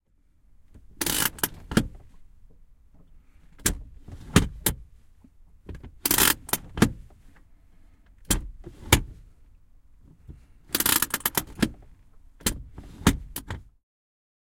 Käsijarru, henkilöauto / Handbrake, car, clicks and creaks, interior, close sound, Citroen 2 CV, a 1981 model
Käsijarru päälle ja pois muutaman kerran, narahduksia ja naksahduksia lähiääni, sisä. Citroen 2 CV, vm 1981, rättisitikka.
Paikka/Place: Suomi / Finland / Vihti
Aika/Date: 09.08.1985
Auto, Autoilu, Autot, Car, Cars, Click, Creak, Field-Recording, Finland, Finnish-Broadcasting-Company, Handbrake, Motoring, Naksahdus, Narahdus, Parking-brake, Soundfx, Suomi, Tehosteet, Yle, Yleisradio